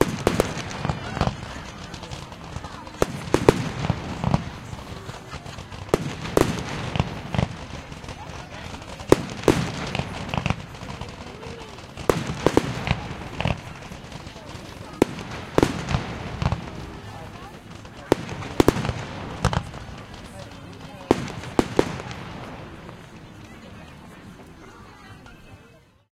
fireworks impact10
Various explosion sounds recorded during a bastille day pyrotechnic show in Britanny. Blasts, sparkles and crowd reactions. Recorded with an h2n in M/S stereo mode.
explosives, show, display-pyrotechnics, explosions, crowd, pyrotechnics, blasts, bombs, field-recording, fireworks